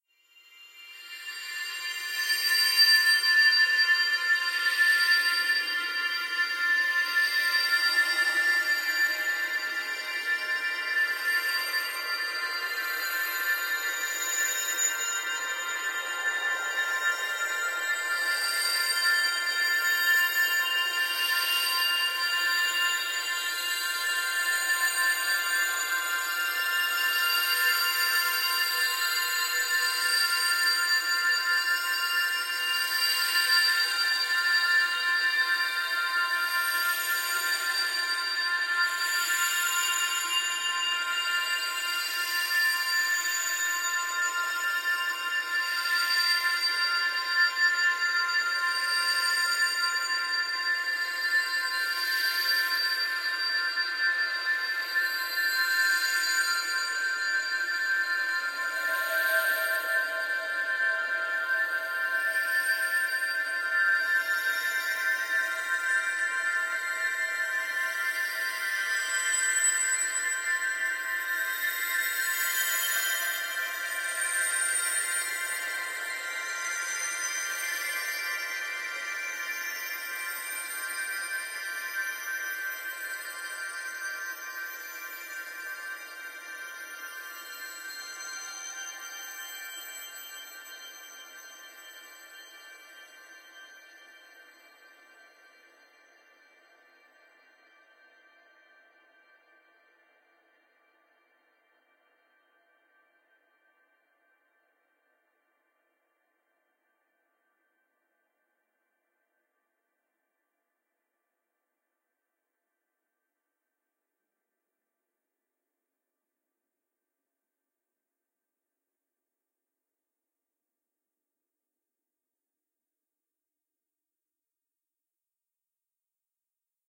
Ambience, Wind Chimes, A
Then I added some extra reverb and EQ'd out the bass in Audacity and this is the result. I'll never have a use for it, so perhaps someone else will.
An example of how you might credit is by putting this in the description/credits:
Originally edited using "Paul's Extreme Sound Stretch" Software and Audacity on 31st August 2016.
ambience, soundscape, chimes, unicorns, calm, bright, paulstretch, wind, chime, rainbows, magic, background, stretch, ambiance, sparkling, sparkle, shimmering, shimmer, paul